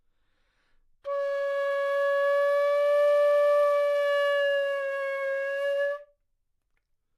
Flute - C#5 - bad-stability-pitch
Part of the Good-sounds dataset of monophonic instrumental sounds.
instrument::flute
note::C#
octave::5
midi note::61
good-sounds-id::3144
Intentionally played as an example of bad-stability-pitch
neumann-U87, multisample, single-note, good-sounds, flute, Csharp5